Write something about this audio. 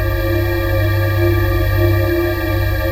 Bight, Breathy Digital Organ made with Reason Subtractor Synths and Logic Drawbar Organ. 29 samples, in minor 3rds, looped in Redmatica Keymap's Penrose loop algorithm.
Breathy, Bright, Digital, Multisample, Organ